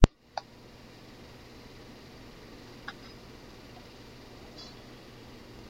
Recorded a really silent ambient noise in my school hallway
ambiance, ambience, ambient, background, background-sound, calm, general-noise, quiet, relaxing, silent, white-noise